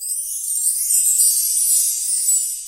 glissando low to high on mark tree with 23 chimes
chime, marktree, barchime